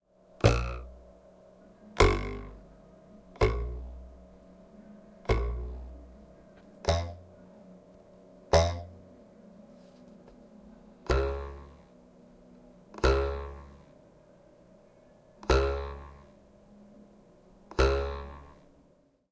A collection of ruler twangs - wood ruler + glass table = TWANG!
twang, ruler